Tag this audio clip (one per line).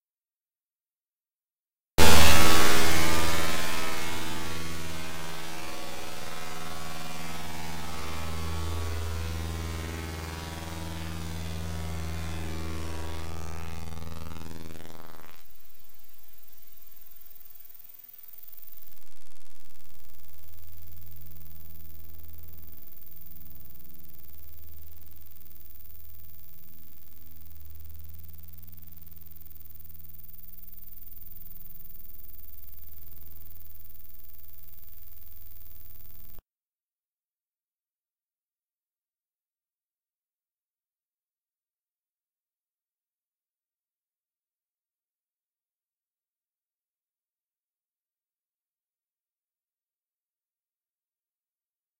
experimental
Dare-26
unpleasant
image-to-sound
sound-experiment
databending